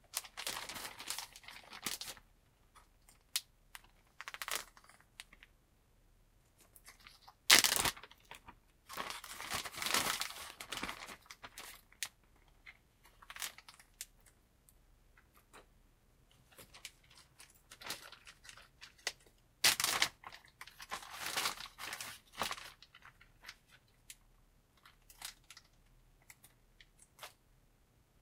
I'm opening a Ziploc bag, putting something inside and closing it.